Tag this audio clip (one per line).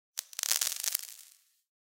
agaxly
cave
crumble
dirt
dust
gravel
scatter